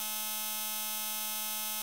Machine Buzzing
A sort of sound that a machine makes.